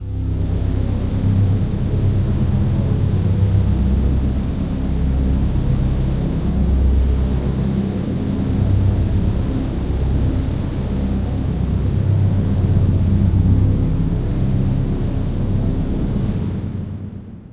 drone 2-Omnisphere
Droney sound made with ableton and Omnisphere 2.
atmosphere, creepy, dark, deep, drama, drone, film, horror, pad, scary, sci-fi, sinister, suspence, terror, thrill